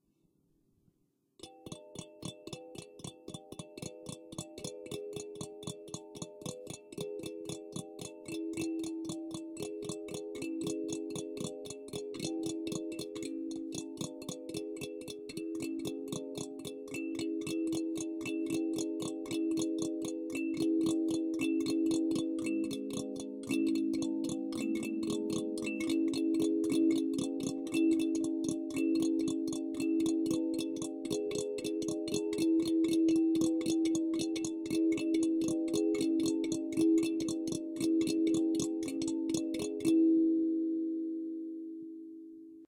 Playing randomly on African primitive instrument
home, office